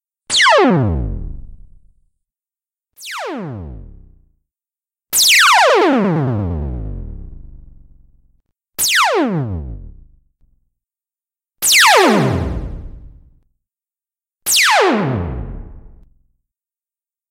Various lazer sounds
Lazer sounds for music project, hopefully useful.